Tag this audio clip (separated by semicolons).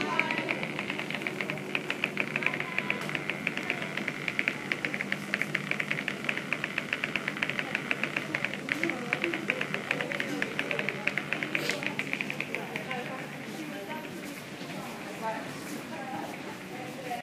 iphone; noisy